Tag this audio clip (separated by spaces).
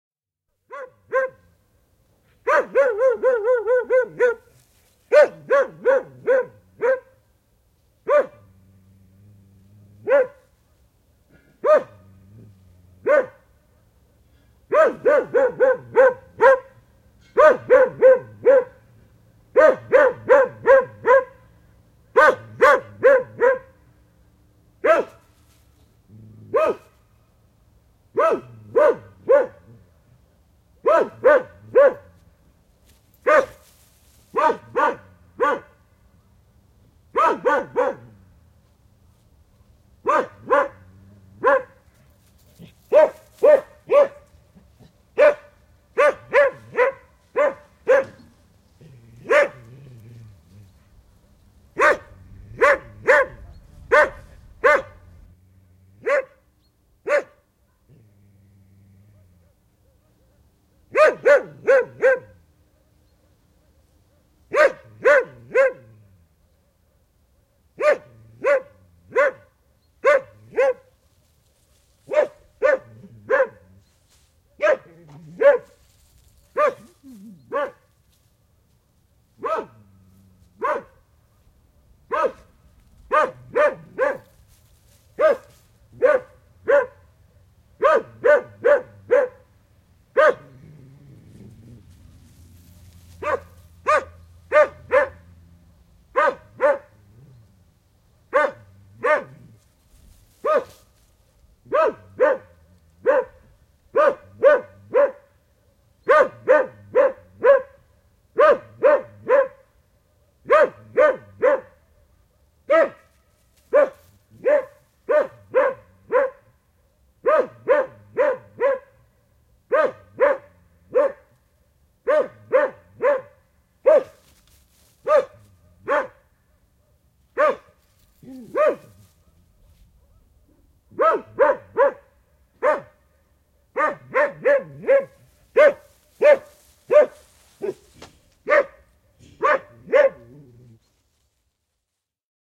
Animals Barking Dog Field-Recording Finland Finnish-Broadcasting-Company Haukku Haukkua Koira Pets Soundfx Tehosteet Yle Yleisradio